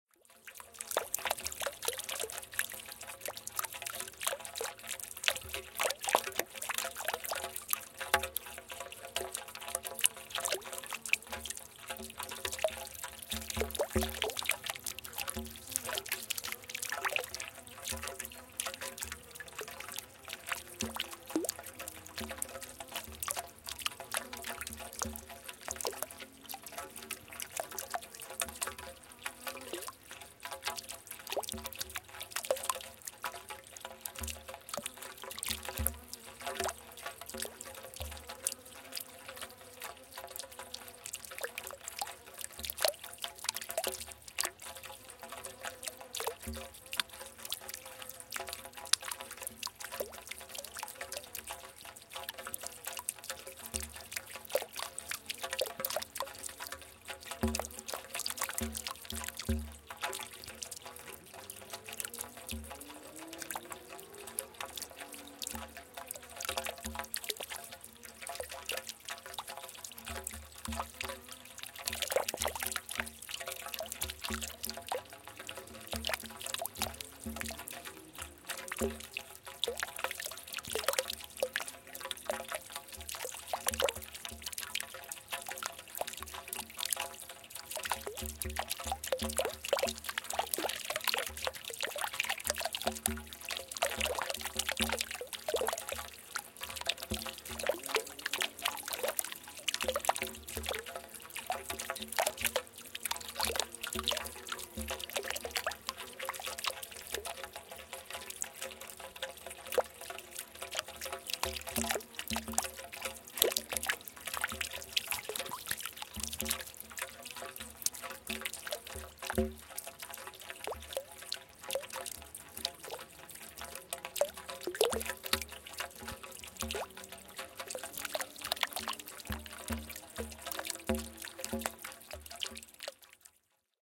Irregular, Low Frequency Dropping Water
Single Small Fountain recording, with Zoom H4
splash, relaxing, fountain, relaxation, brook, trickle, trickling, flow, meditative, liquid, gurgling, creek, field-recording, ambient, flowing, shallow, water, waves, river, bubbling, babbling, nature, stream, gurgle